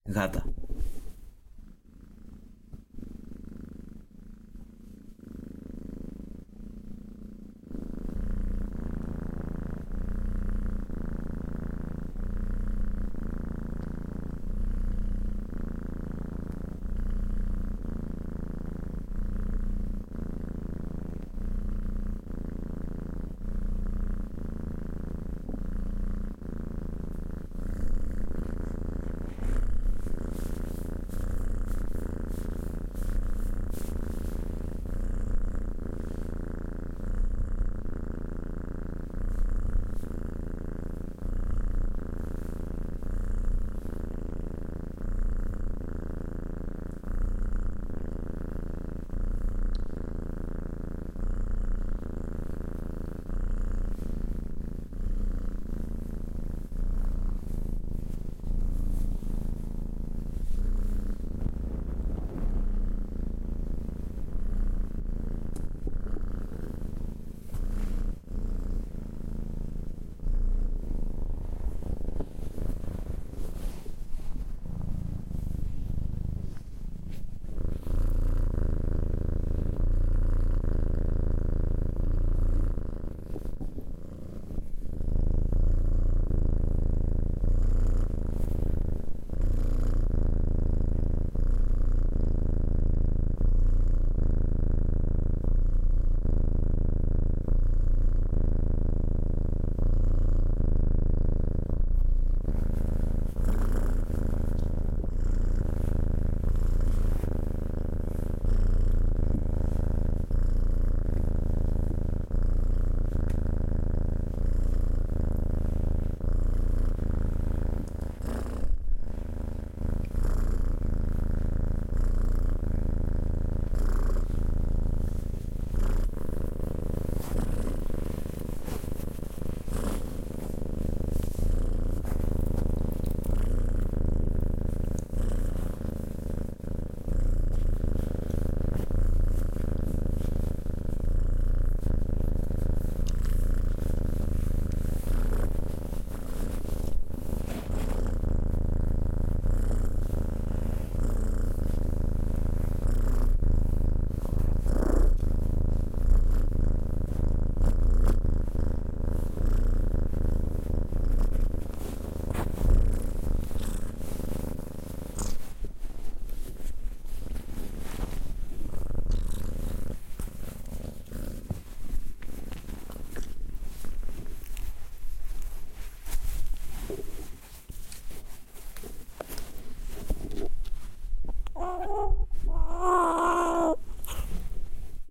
Misha purrs
My cat, Misha, purrs for a while. Recorded with a Zoom H5 right next to his nose hence the extreme stereo wideness. Apologies for the noises, sometimes it's just the recorder bouncing on the chair or me petting the cat so he keeps purring.
animal, cat, closeup, domestic, kitten, purr